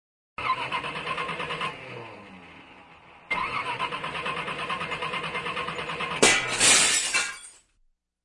A cranking engine shatters like glass.